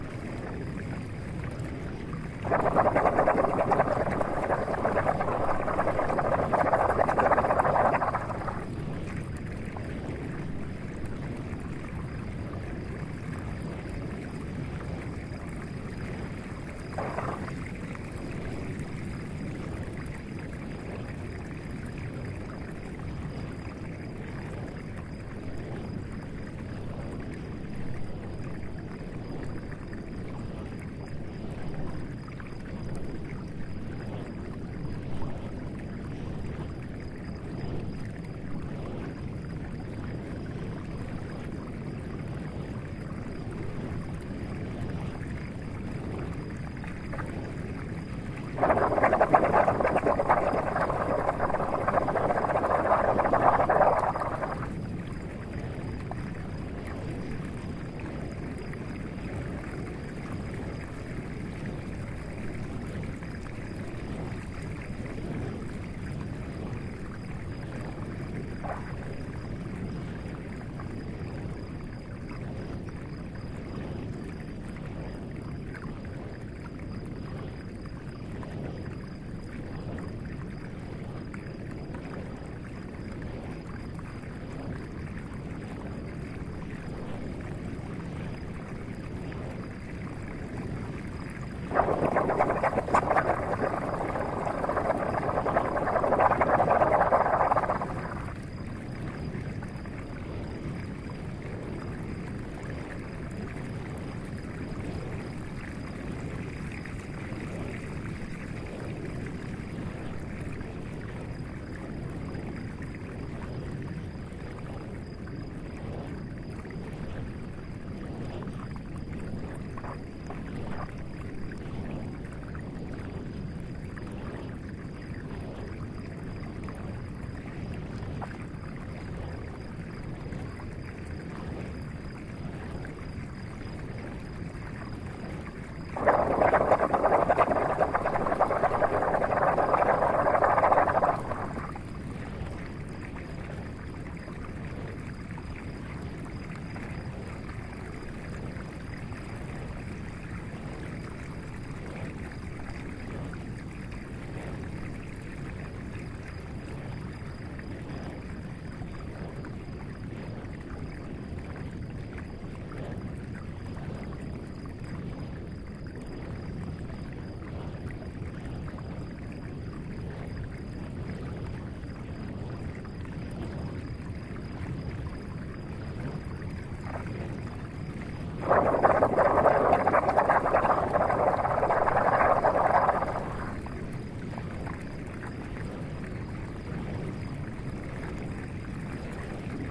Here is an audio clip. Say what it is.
waterspring fafe ambient noise
A water spring sound captured 3 meters from where it was coming out of the soil. Also the sounds of the wind plants and crickets.
countryside, crickets, field-recordings, nature, soundscape, water, wind-plant